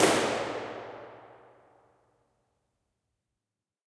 IR clap small Hexagonic Chapel layered
Clap in a small Hexagonic chapel near Castle Eerde in the Netherlands. Very useful as convolution reverb sample.
small, IR, impulse-response, layered, Chapel, Hexagonic, response, clap, impulse